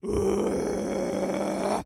cell scream low
short weird scream for processing, Low